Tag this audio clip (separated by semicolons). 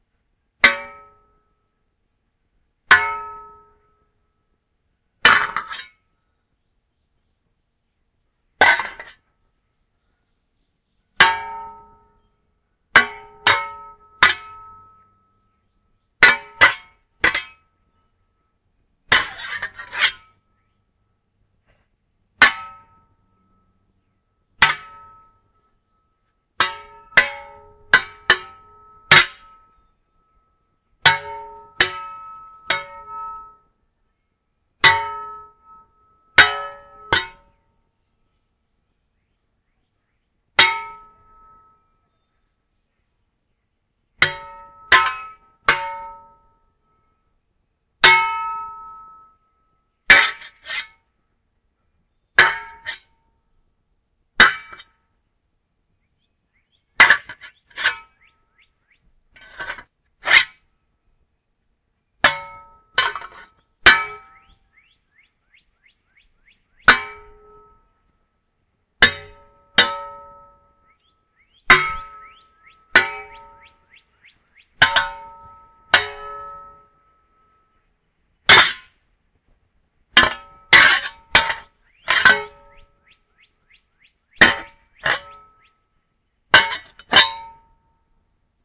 swing; clang; shovel; dig; whoosh; fight; hit